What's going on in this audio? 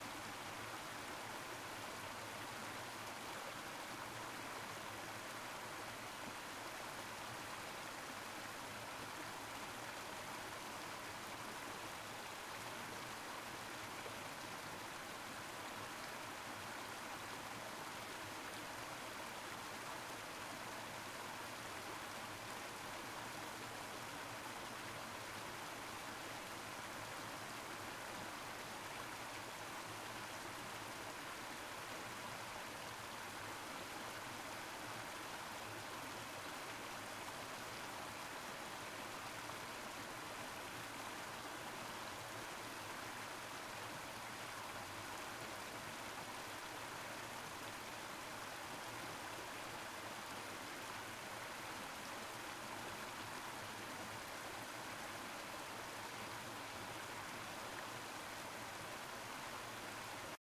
Recording of a local river passing over rocks. Recorded with a Tascam Dr100 and Behring C4 microphone
Beck, Lake, River, Stream, Water, Waterfall